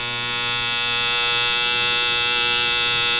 Airplane Stall Buzzer
The sound of a buzzer that is really good for the sound of an airplane's stalling buzzer.
aircraft-stall, airplane-stall, stall-buzzer